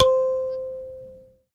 SanzAnais 72 C4 minibz b
a sanza (or kalimba) multisampled with tiny metallic pieces that produce buzzs
african, kalimba, percussion, sanza